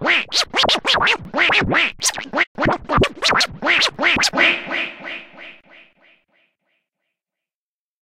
Scratch Quack 2 - 3 bar - 90 BPM (no swing)
Acid-sized sample of a scratch made by me with the mouse in 1999 or 2000. Baby scratch. Ready for drag'n'drop music production software.
I recommend you that, if you are going to use it in a track with a different BPM, you change the speed of this sample (like modifying the pitch in a turntable), not just the duration keeping the tone.
Software: AnalogX Scratch & Cool Edit Pro 2.1
acid-sized, dj, hip-hop, hiphop, rap, scratch, scratching, scratch-it